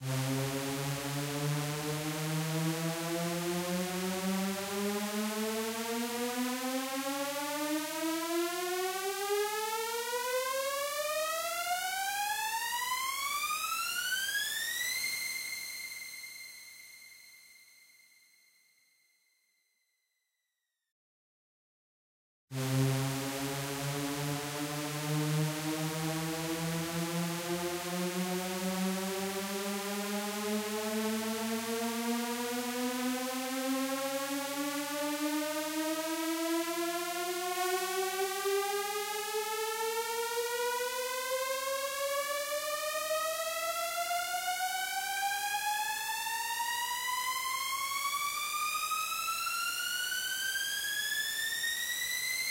This is simply a synth playing a C note with the pitch automated. Typically used in modern electronic music to signify a build and create an euphoric feeling.